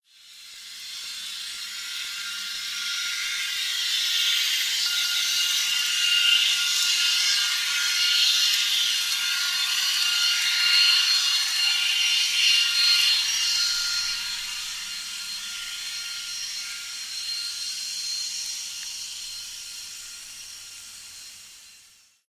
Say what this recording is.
Recorded on the 14th of May, 2007, about three miles east of Rio Vista, California.